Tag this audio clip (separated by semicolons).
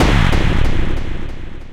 Dance; Trance; Explosion; Fx; Psytrance; Processed